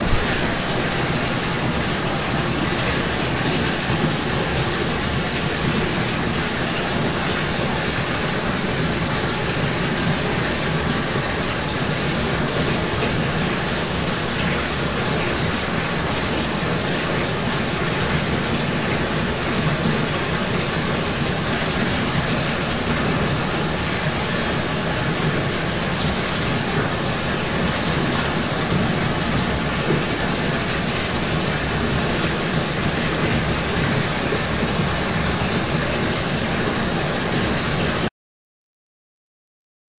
Rushing water
water, unprocessed